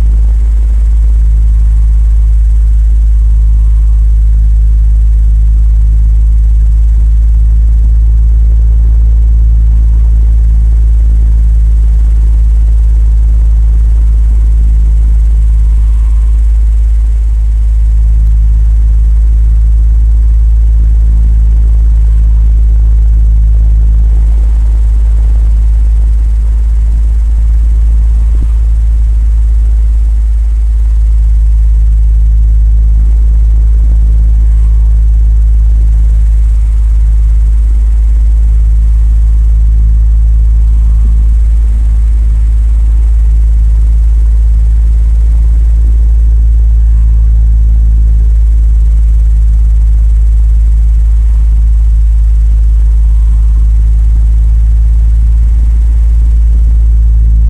fan from back side
Creaky fan recorded from air pulling side. This time it’s not rotating and is producing almost monotonic low helicopter-like vibrating sound.
Recorded by Sony Xperia C5305.
air, fan, low, ventilation, vibration